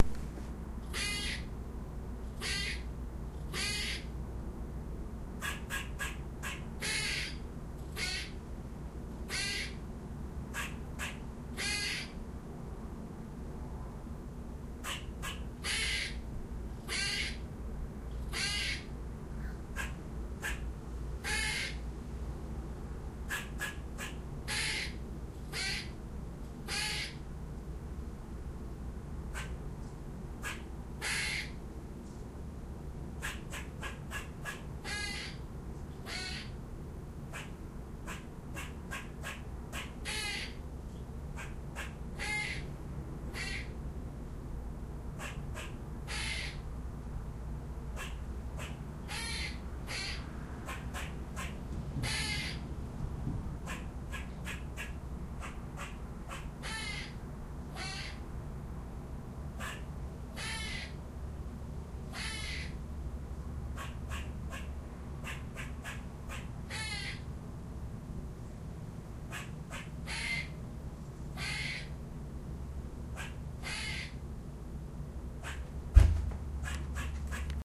raw squirrelbark
Raw unedited recording of squirrel noises recorded with DS-40.